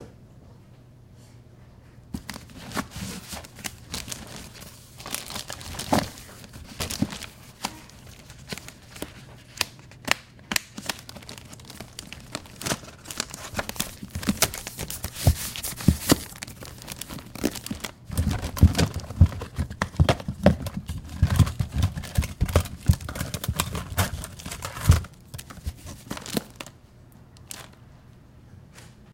shuffling papers
Shuffling through paper and containments of a big old trunk